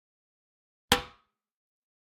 Hitting Metal 10
dispose, garbage, hit, impact, iron, metal, metallic, rubbish